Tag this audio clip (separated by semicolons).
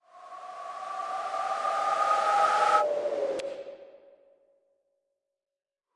long; swish